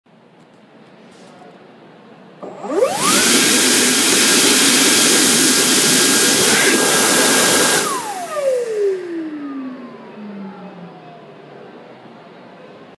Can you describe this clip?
high-powered blowing sound, usable in techno tracks
blowing, machine, hand, vroom, electric, transformer, robots